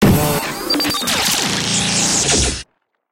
Radio Imaging Element
Sound Design Studio for Animation, GroundBIRD, Sheffield.